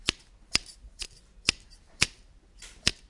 essen mysounds alena
Essen, germany, mysound, object